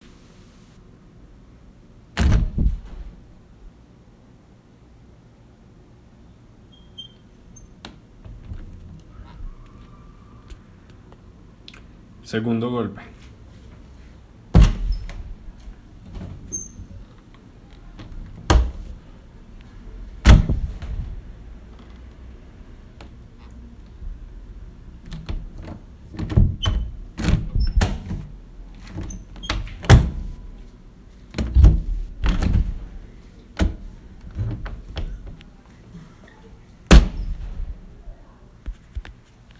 A doors open
opening, open, doors